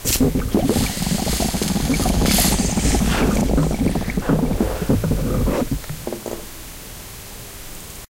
Air passing through water at the bottom of a cylindrical piece of glass recorded with a radio shack clipon condenser mic direct to PC. Note background noise of a lighter...
breath, bubbles, lighter, noisy, water